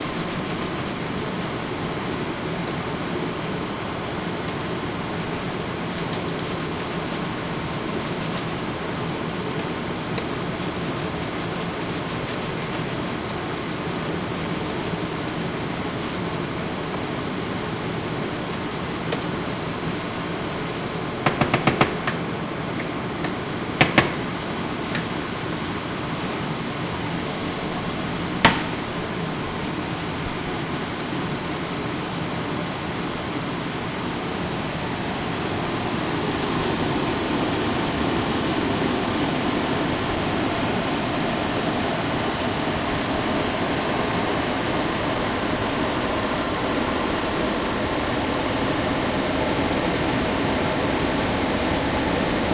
Recording of stovetop cooking, with the vent fan on. Recorded on Zoom H2.
food, heat, vent